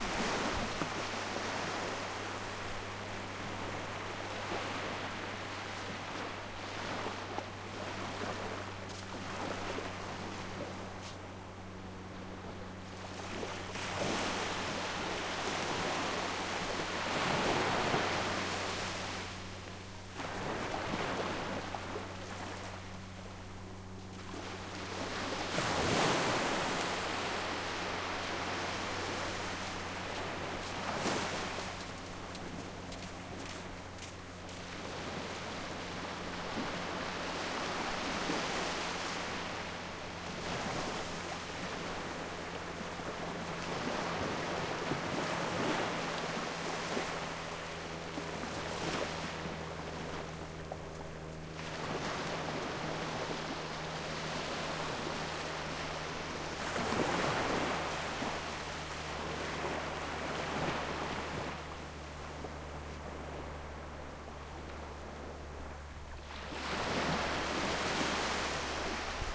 field recording during a walk at the beach on the island of langeoog, north sea / lower saxony. recorded with zoom h2.